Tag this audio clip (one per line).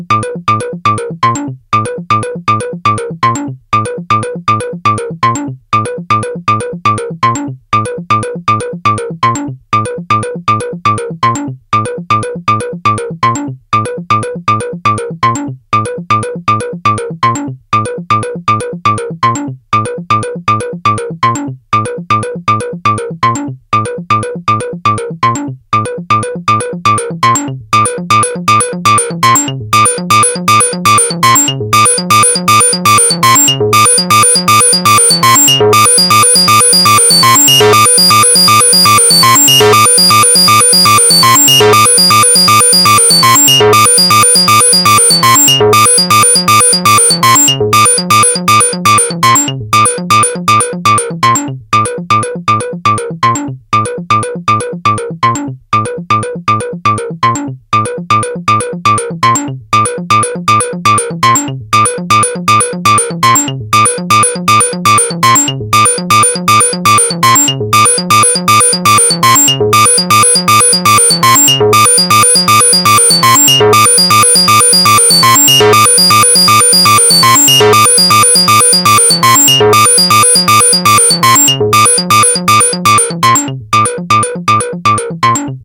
Analog
Modular
Synth
W0